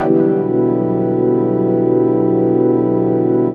ASBS - House Chord 003
chord, house, ASBS, stab, sound, samples